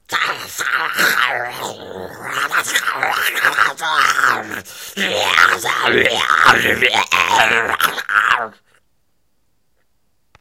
Restrained Zombie
This was just my voice. No effects used.
moan, zombie